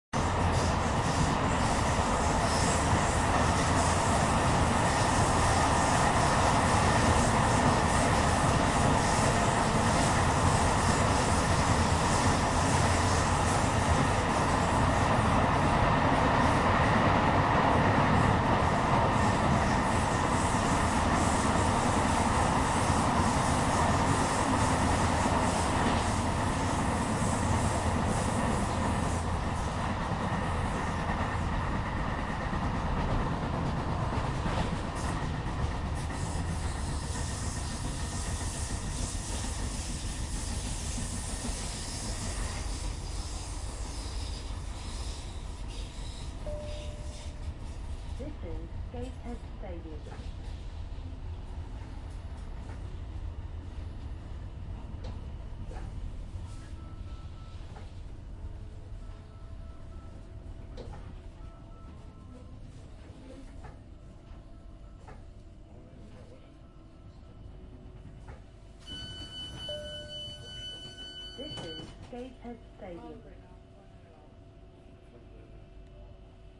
interior train subway underground metro exits tunnel and arrives at overground station
above-ground, arrival, arrive, arrives, field-recording, interior, light-rail, metro, overground, station, subway, train, travel, travelling, tunnel